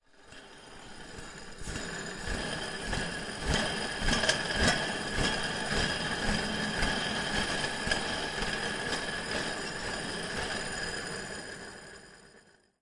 Rolling Metal Conveyor Belt
Hand rolling metal rolling pins in a conveyor belt. Tried to get as many of the pins rolling as fast as possible to create a grating moving sound.
aip09, conveyor, conveyor-belt, metal, rolling, rotating, shop, spin, spinning